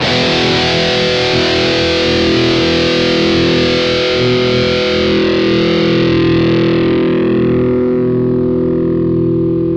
01 Dist guitar power e long
Long e power chord - Distorted guitar sound from ESP EC-300 and Boss GT-8 effects processor.